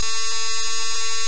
These are snippets created in Cool Edit after reading in the help file that the program can create sound from text.